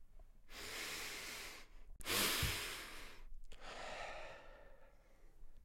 Werewolf sniff dry
A predator sniffs at the neck of a potential victim.
By Malcolm and Ethan Galloway, Clockwork Heart Productions.